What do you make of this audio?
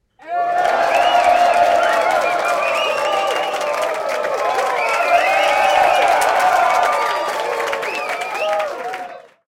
Crowd cheering at Talk & Play event in Berlin.
Thank you and enjoy the sound!